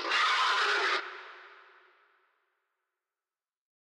Monster Growl
A demon see's you
ambient, boss, creepy, dark, demon, end-boss, growl, hell, horror, monster, nintendo, sega, video-game